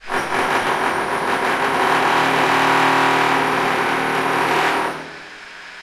Pneumatic Drill Song
Record by building of elevator. With stereo - microphone.